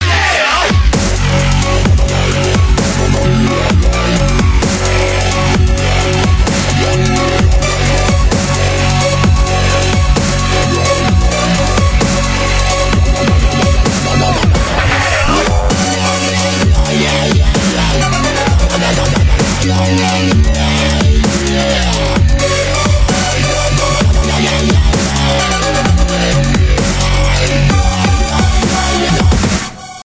Battle Ion Template 01 (Loop)
This is my first loop for anyone to use. I made it from a template i created for a track using Fruity Loops, Harmor, Harmless and Sytrus.
bass, compression, dirty, drums, dub, dubstep, fruity-loops, kick, loop, original, sample, snare, synth, uk